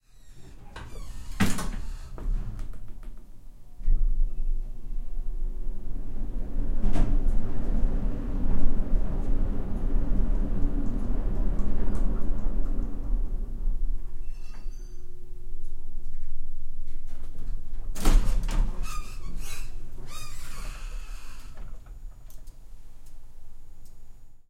Elevator Ride and Door Open Merchants Bldg
Modernized elevator in old building….door closes, elevator descends, door opens - with a nice squeal that gives it some character. Recorded with Microtrack II.
close, door, elevator, lift, machine, open, ride, squeal, whiz